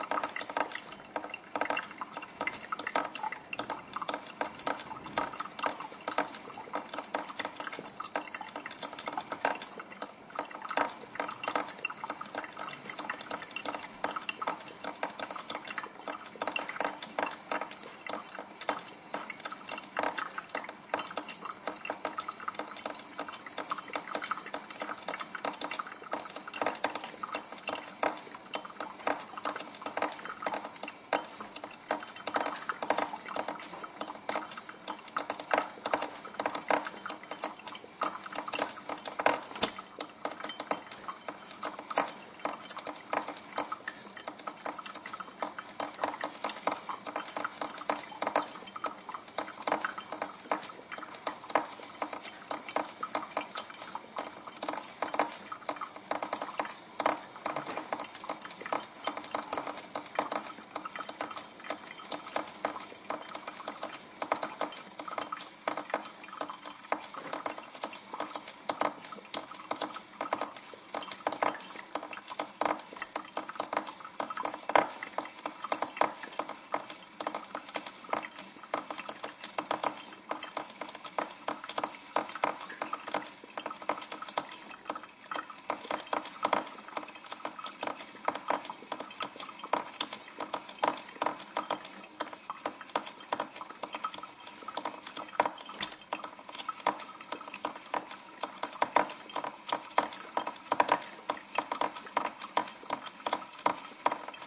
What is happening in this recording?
A drip coffee maker brewing coffee. Recorded with a Sony M2 on March 7, 2015.